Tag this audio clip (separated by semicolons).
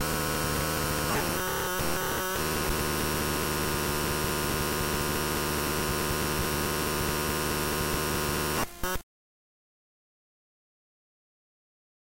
electricity; flash; internal; memory; noise; record